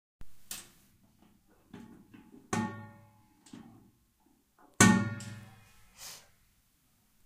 Sound of trash bin
Bin, Kitchen, Trash